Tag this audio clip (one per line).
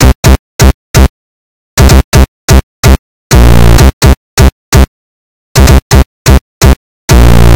127
2000
bpm
c64
gate
korg
loop
ms
opera
sample
siel
sound
synth
synthie
trance
trancegate